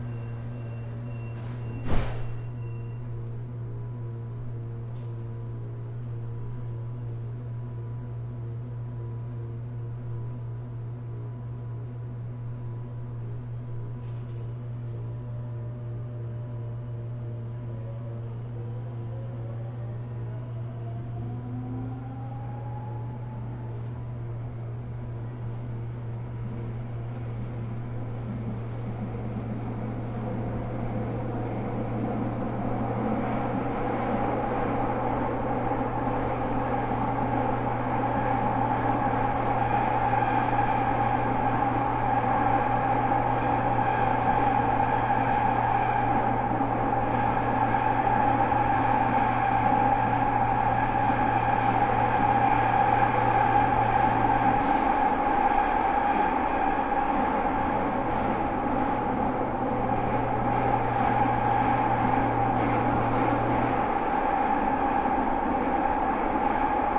Metro trip 4
Madrid metro trip. Recorded with Soundfield SPS200, Sound Devices 788T, converted to stereo by Harpex-X
ambiance, ambisonic, field-recording, metro, subway, train, tube, underground